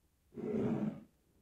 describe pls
Me sliding a glass cup around on a wooden surface. Check out my pack if this particular slide doesn't suit you!
Recorded on Zoom Q4 Mic